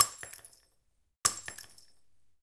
Dropping glass shard 5
Dropping a glass shard on a floor scattered with other shards.
Recorded with:
Zoom H4n op 120° XY Stereo setup
Octava MK-012 ORTF Stereo setup
The recordings are in this order.
glasses, breaking, ortf, dropping